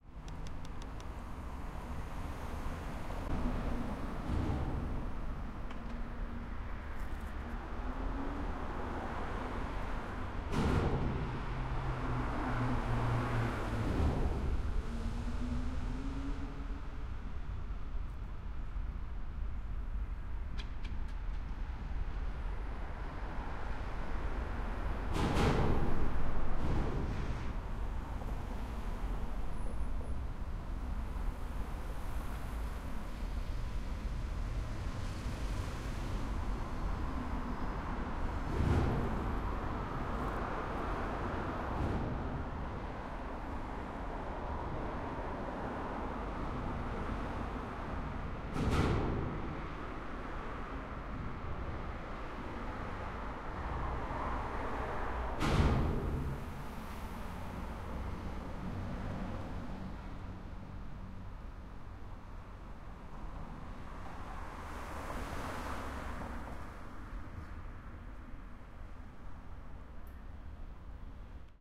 Underground tunnel with vehicles driving by
underground-tunnel, cars, field-recording, city, street, ambience, road, bikes, noise, highway, car, traffic